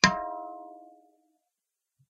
Thin bell ding 1
A short, thin bell chiming.
chime, ding, dong